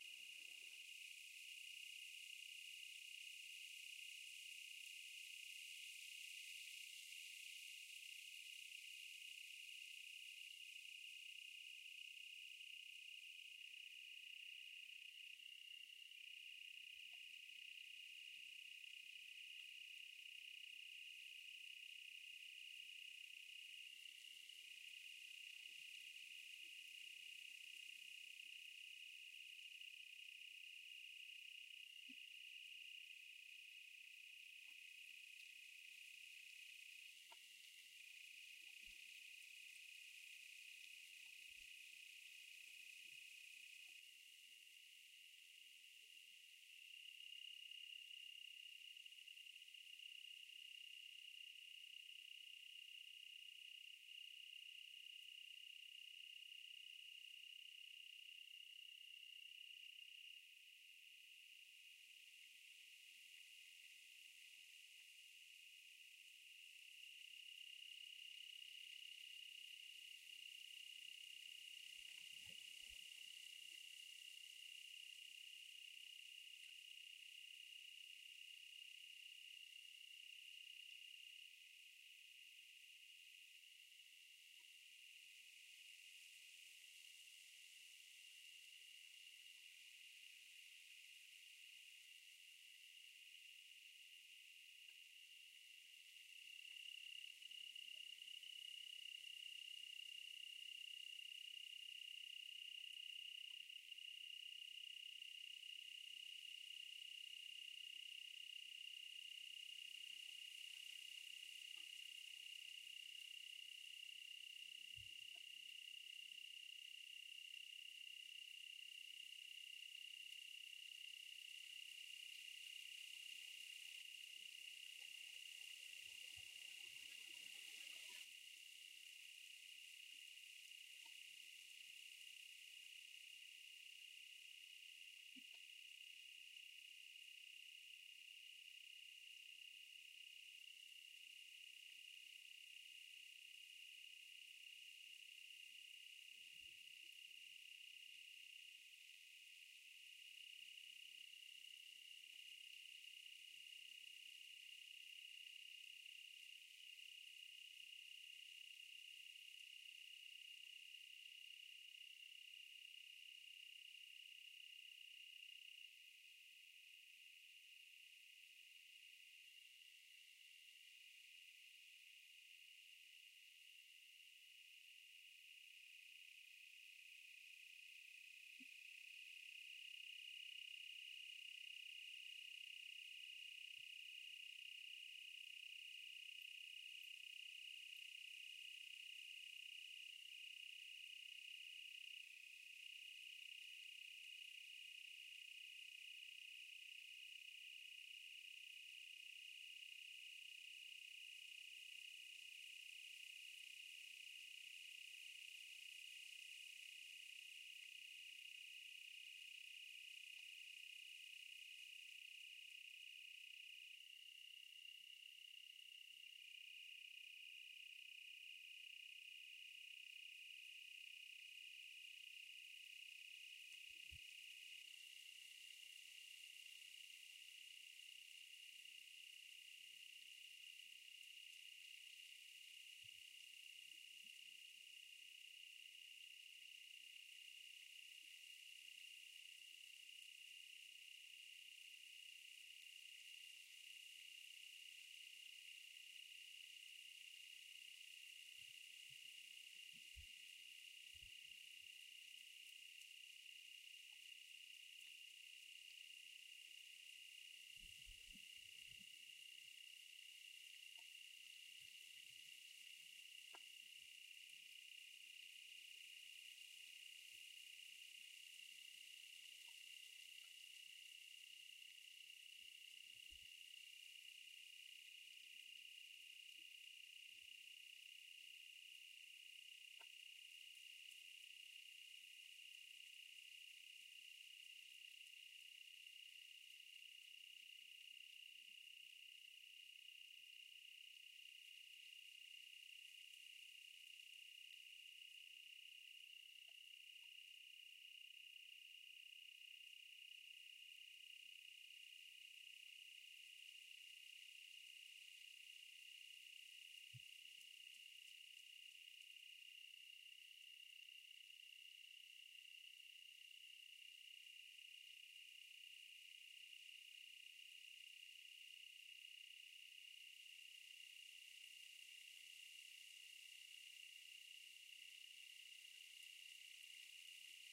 recorded October 5, 2011 in Zion Canyon of Zion NP . Utah . USA
by Michael Fousie